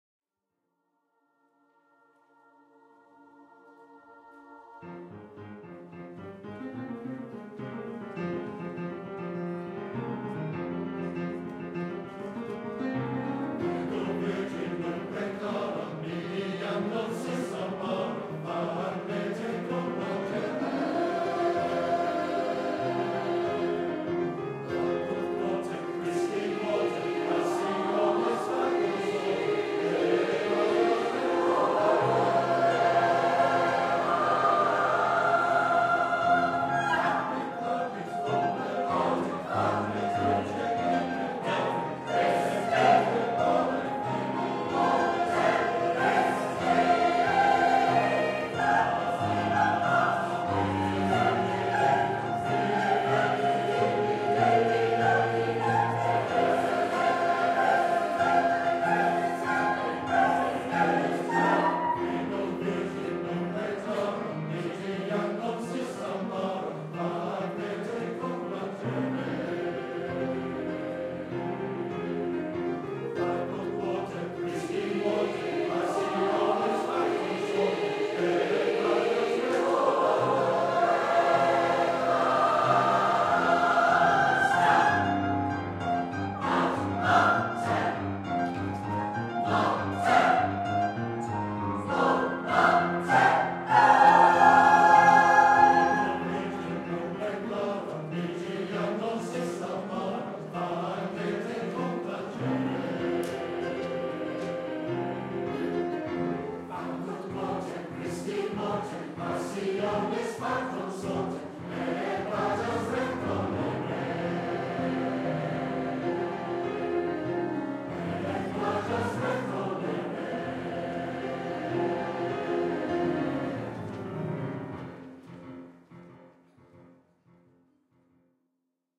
A live recording of a 100+ choir in rehearsal. Recorded using a matched pair of Rode NT5 for the left & right with an additional AKG c451 for the centre. The idea of this recording was to capture the way a choirs sound fills a room.
Some minor addtional EQ has been used in the 35Khz range boosting by 1db to give the performance a little oompf.